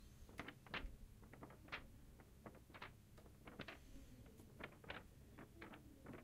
Moving paper rapidly
book, sharp, turning-pages